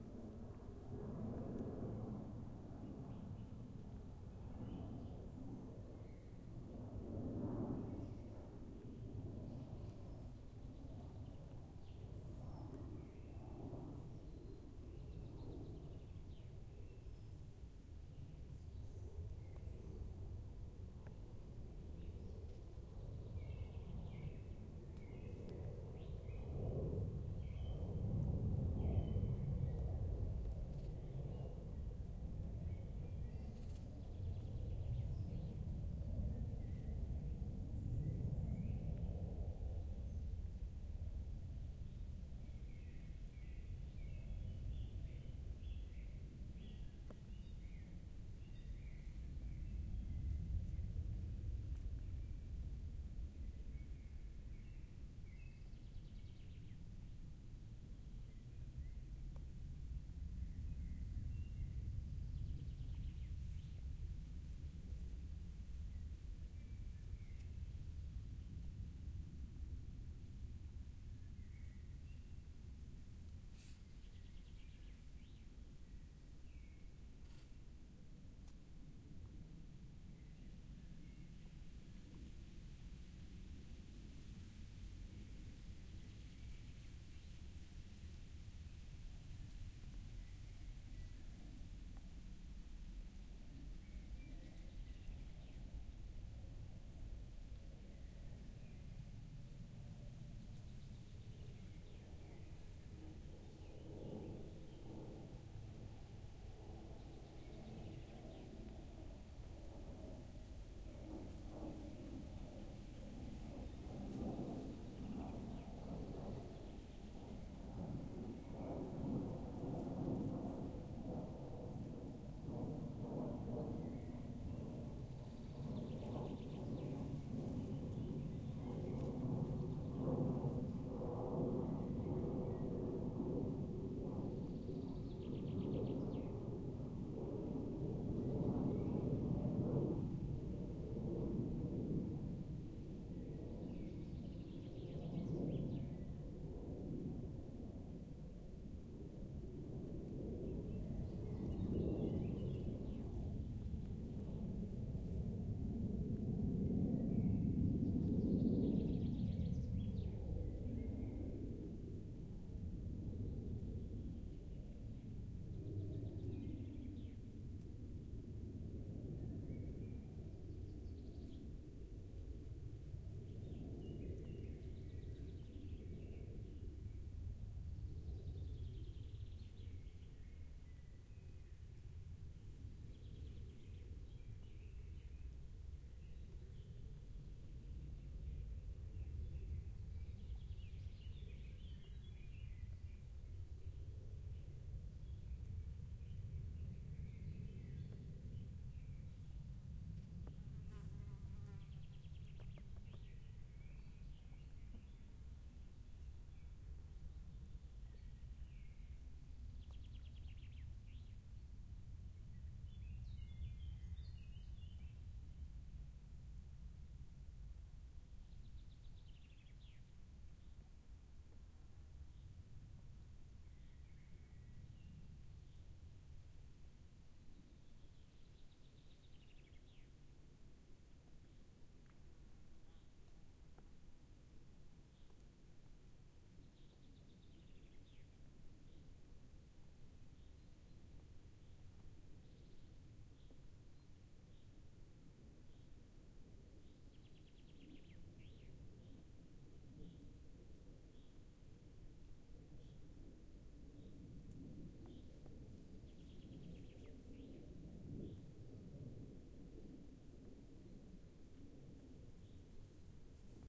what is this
ambience, forest, shore, reeds, bulrush, province, Dolginiha
Recorded using Zoom H5 XYH-5 mics. Shore near Dolginiha (near Moscow)
birds, woods, grass, aircraft, forest, wind, bulrush, reeds, shore